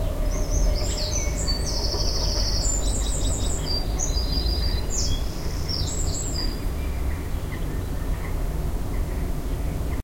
Northern Wren (Troglodytes troglodytes)
A northern wren sings in the early morning and a moor hen is making noise, as well as the city in the background. Recorded at some moment in between 6:30 and 7:30 a.m. on the 26th of May 2007 with an Edirol R09 that was hanging in the hammock on my balcony
bird, wind, street, nature, field-recording, street-noise, birdsong